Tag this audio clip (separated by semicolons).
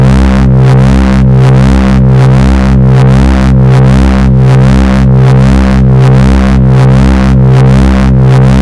driven; reece; drum-n-bass; heavy; harsh; bass